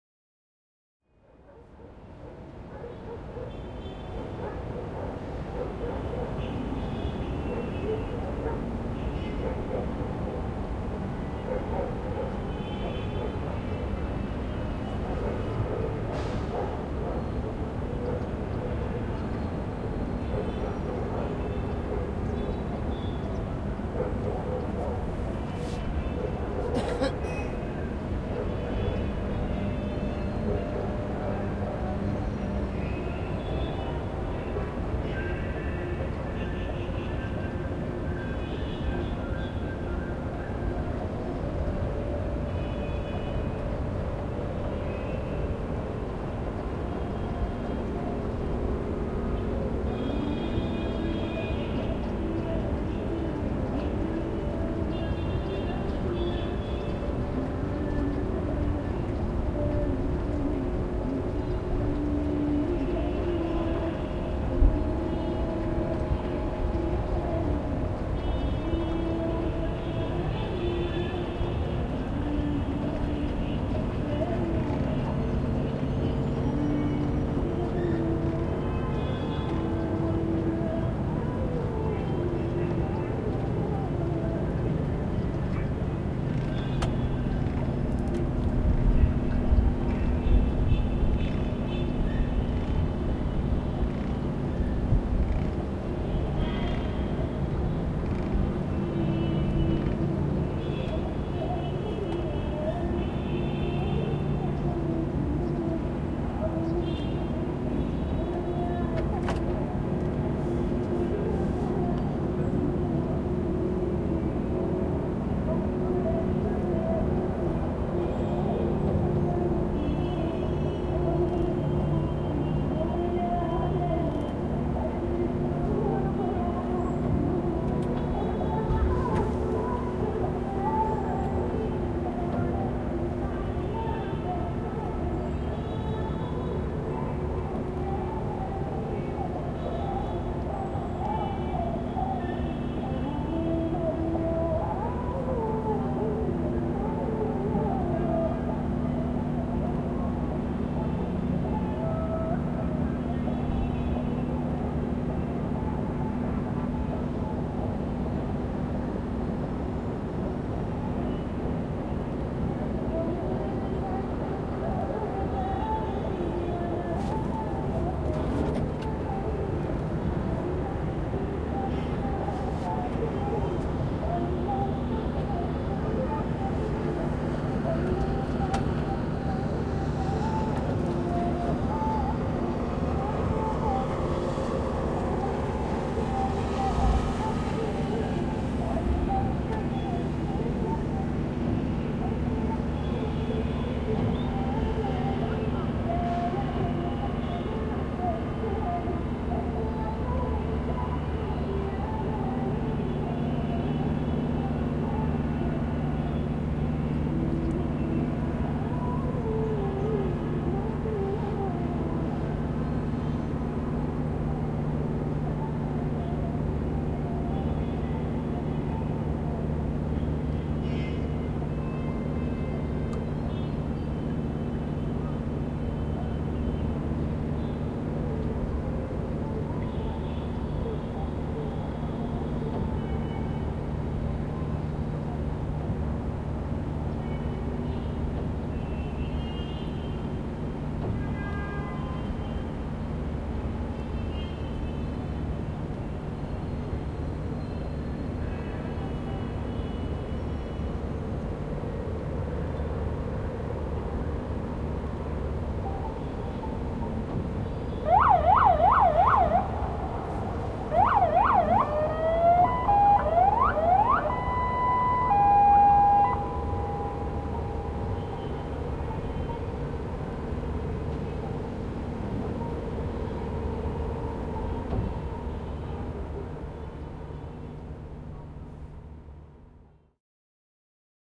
2014/11/24 - On the Nile, Cairo, Egypt
3pm - On a fellouqua.
Sailing on the Nile river.
Light wind. Water lapping.
City sound on the background. Music, traffic and horns.
ORTF Couple